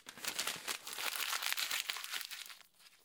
Crumpling Paper in my hand
crumple, crumpling, paper